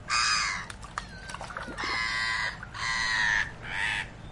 Seabird Screech
Seagulls at Dublin Zoo